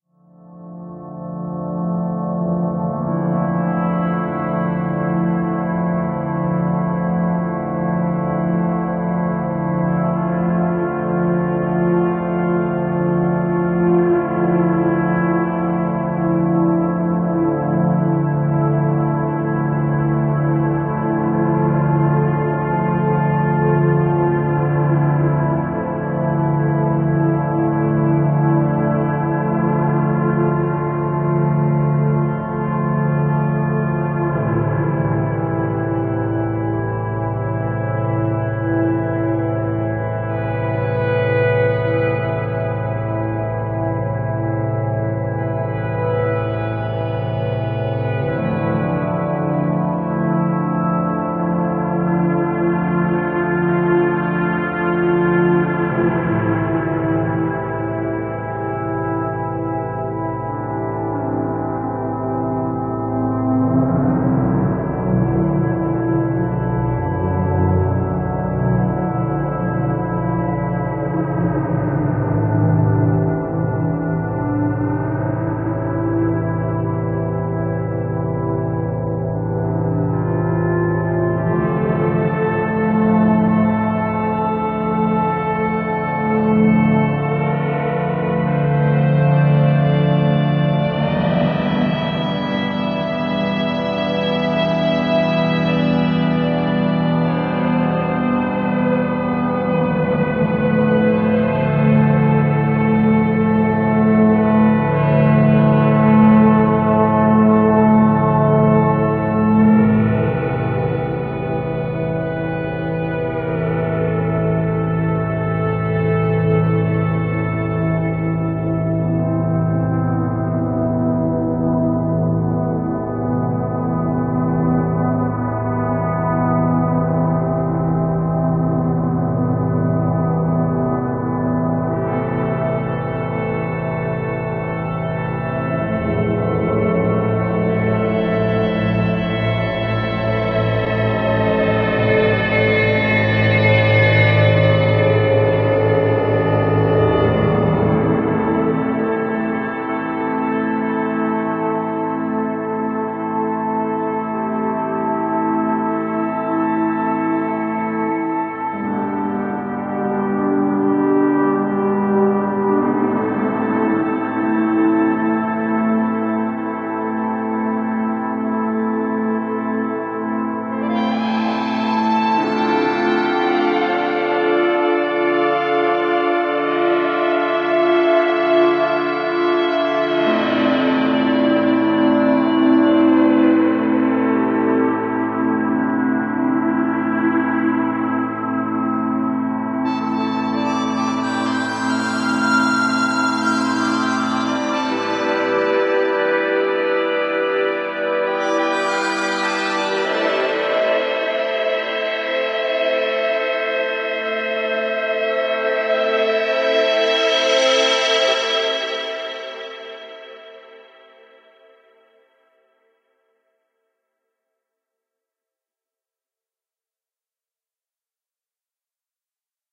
Made with the Mugician synth app, on an iPad 1.